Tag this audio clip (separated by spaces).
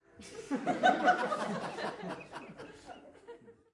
audience happiness